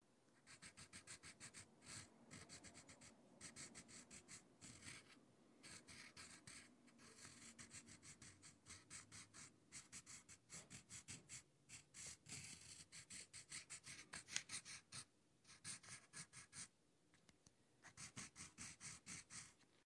Pencil Drawing on Paper - Closer Aspect
A pencil drawing on paper recorded from 2 inches. Some longer lines, mostly shorter shading